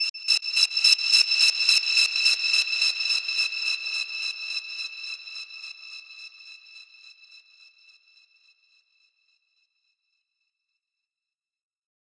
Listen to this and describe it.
PULSE GHOST IN E 160BPM DOTTED 8THS 01
A high-pitched synth tone, gated and echoed in stereo. Tempo is 160 BPM but the gate is opening on dotted 8ths. Key is E.
pulse, synth, 160bpm, ghost, echo, gated, gate, key-of-e